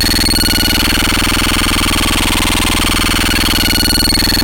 Rabid Computer
All sounds in this pack were made using a hand soldered synthesiser built in a workshop called DIRTY ELECTRONICS. The sounds are named as they are because there are 98 of them. They are all electronic, so sorry if "Budgie Flying Into The Sun" wasn't what you thought it was.
Make use of these sounds how you please, drop me message if you found any particularly useful and want to share what you created.
Enjoy.
16-bit, 16bit, 8-bit, 8bit, Beep, Beeping, chip, circuitry, computer, Digital, electronic, FM, Frequency, game, Modulation, robot, robotic, synth, synthesiser, synthesizer